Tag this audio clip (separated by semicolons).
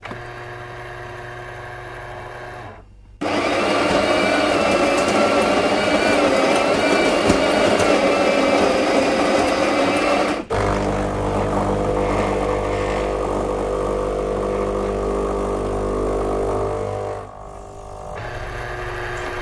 breakfast; espresso; kitchen; krups; machine; coffee